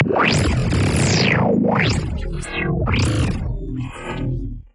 layered granular 07
Foley samples I recorded and then resampled in Camel Audio's Alchemy using additive and granular synthesis + further processing in Ableton Live & some external plugins.